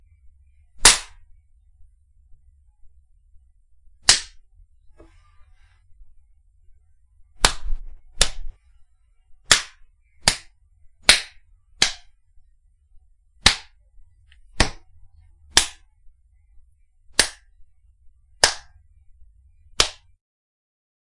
Various Slaps
Used for any slapping of any body part that is of bare skin.
body, hand, harsh, impact, intense, loud